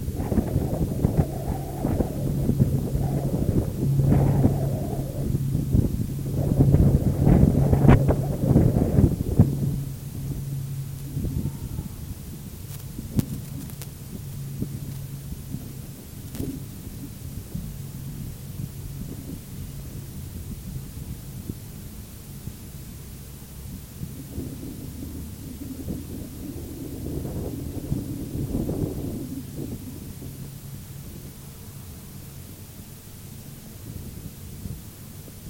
Contact mic recording of bronze sculpture “Bronco Buster” by A Phimister Proctor ca. 1915. Recorded February 20, 2011 using a Sony PCM-D50 recorder with Schertler DYN-E-SET wired mic; this recording made from the front left hoof. Normalized, with a few pops removed.